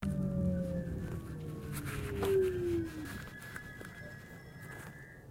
This sound is cut out from train 01 recorded by Sony IC recorder. The train type is Škoda InterPanter. This sound follows train start. This track is recorded between station Podivín and Břeclav.